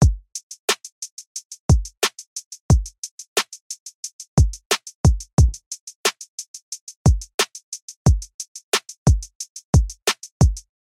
Hip-hop drum loop at 179bpm